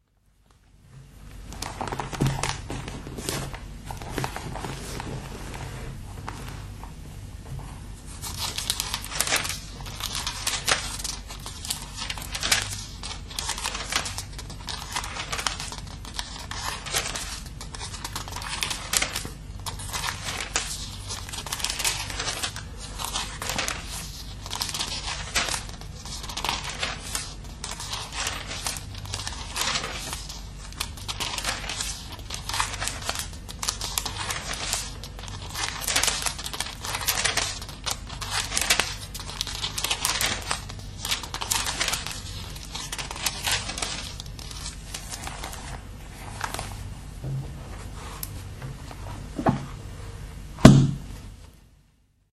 Turning the pages of the book Numbers in the bible (dutch translation) the church has given my father in 1942. A few years later my father lost his religion. I haven't found it yet.
book, paper, turning-pages